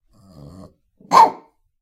Small Dog Snarl and Bark
A small dog growling and barking.
A newer extended clip here:
animal, barking, dog, growling, small-dog, snarl, woof